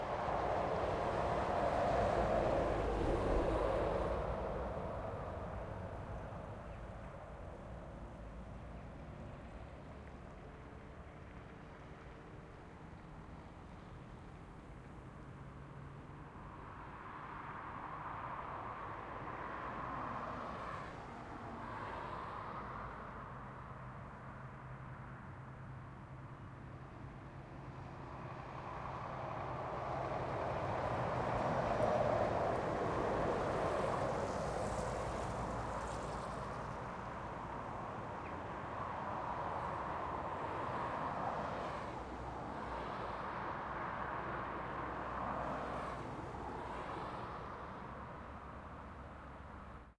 A highway in Sweden. Recorded with a Zoom H5 with an XYH-5 Stereo mic.
car, cars, driving, field-recording, freeway, highway, motorway, noise, road, street, traffic, truck, trucks